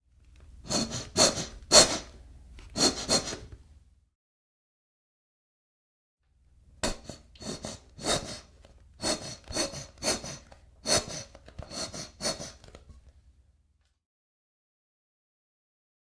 14 - Soap, empty, squeeze
Squeezing of empty soap. (more versions)